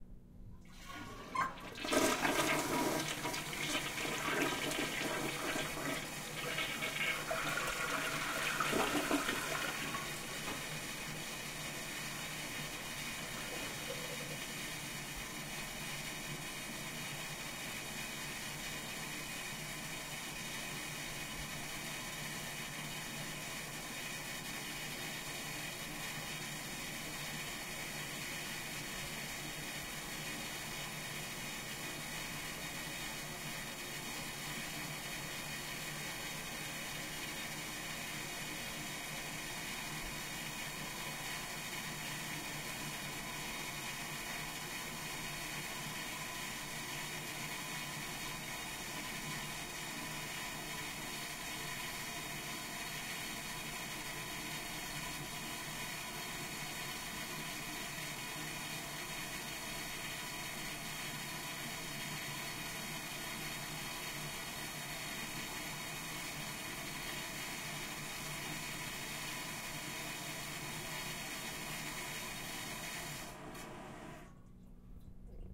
Toilet flush
Flushing a toilet
H6, flush, toilet, field-recording